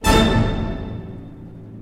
orchestra hit 2
Famous orchestra hit.
Play the sample in different keys and you hear what I mean.
80ies, classic, cmi, eighties, fairlight, hit, music, stab